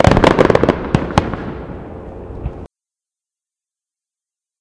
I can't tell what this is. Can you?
tre hit long
recording of a triple firework explosion
fireworks, loud, outside, hit, long, multi, explosion, ambience, triple, distant, fire